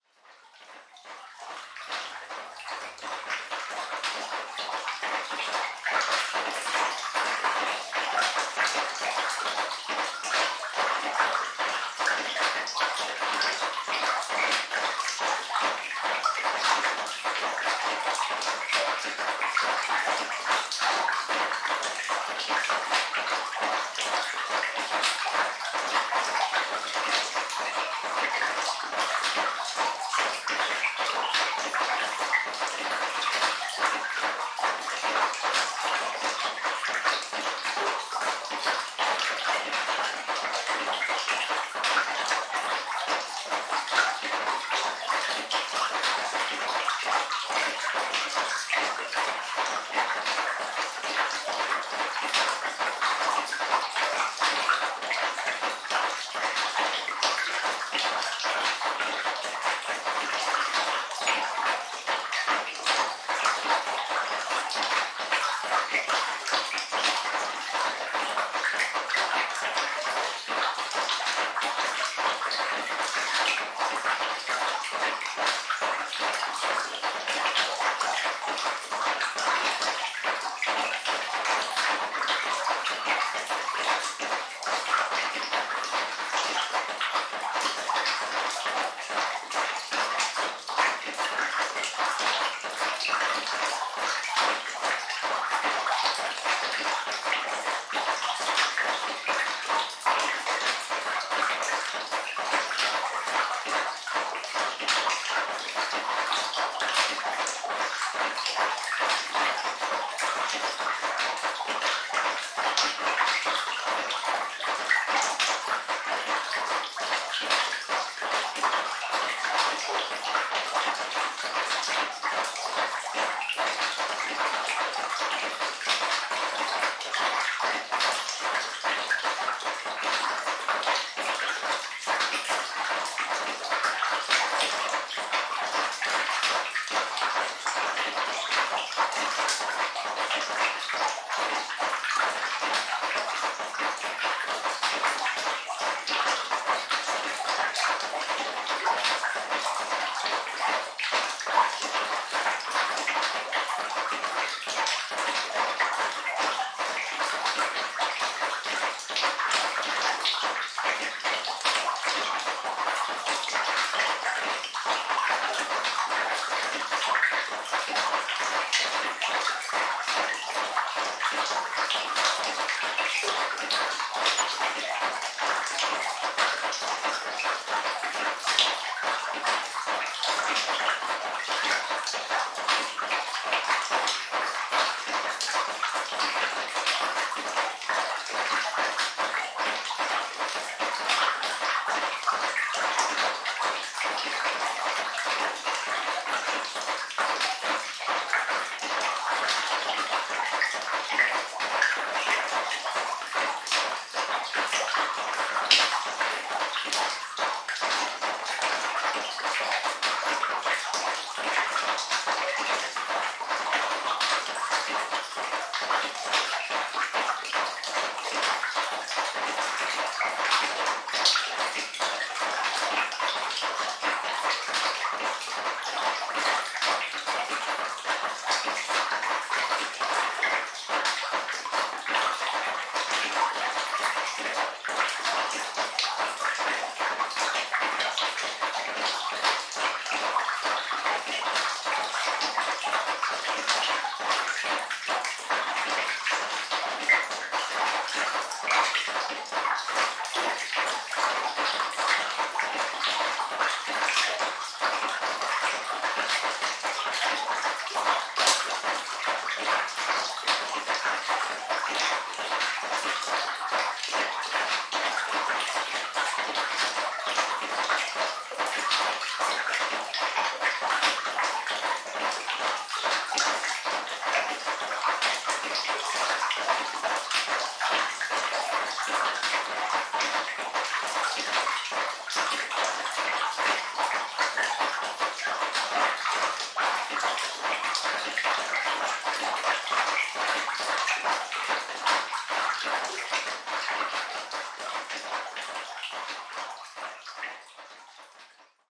boleskine house flooded basement
Recording made with H1 Zoom recorder in the flooded basement in the burnt ruins of Boleskine House.
You can hear the dripping water from the cellars roof falling into a flooded floor. The water comes from unsealed water pipe destroyed by the house fire back in 2015.
basement, flood, water